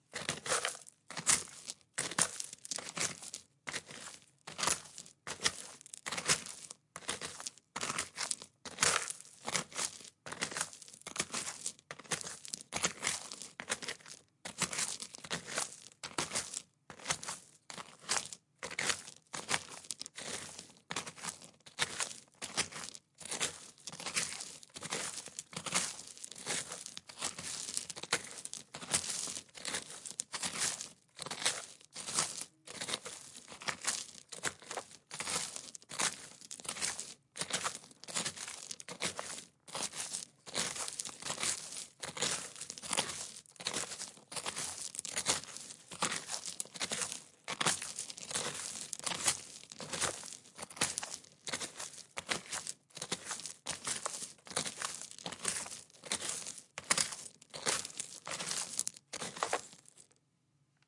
Footsteps Walking On Gravel Stones Very Slow Pace
Asphalt, Beach, Boots, Clothing, Concrete, Fabric, Fast, Footsteps, Gravel, Loose, Man, Outdoors, Path, Pavement, Road, Rock, Running, Sand, Shoes, slow-pace, slow-speed, Sneakers, Snow, Staggering, Stone, Stones, Trainers, Trousers, Walking, Woman